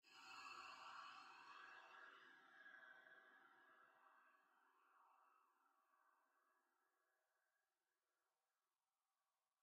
Static with a long reverb. Flanger and phaser were applied somewhere while making this.